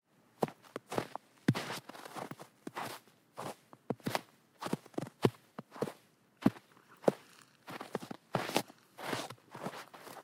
Walking in snow. Recorded with a Zoom H1.